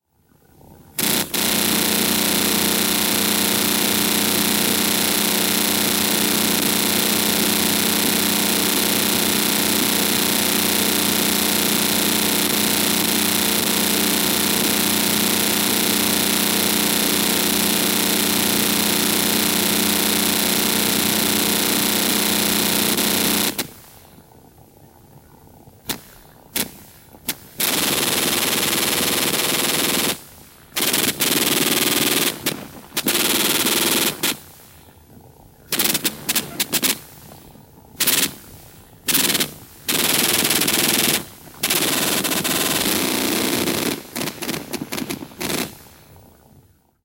When I move the cursor or zoom in Google Earth this weird sound comes from the PC speakers if the op-amp is cranked loud. First part of the recording is moving the cursor in circles, second part is zooming. The zooming reminds me of a teletype, teleprinter. Anyone else get this sound ?